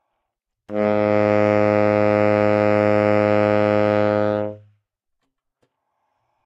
Sax Tenor - G#2
Part of the Good-sounds dataset of monophonic instrumental sounds.
instrument::sax_tenor
note::G#
octave::2
midi note::32
good-sounds-id::4965
multisample, good-sounds, tenor, sax, neumann-U87, Gsharp2, single-note